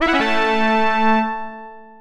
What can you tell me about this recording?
Small fanfare created in Ableton, if you need a song that plays when your character gets a cool item or completes a mission
item, fanfare, mission, trumpets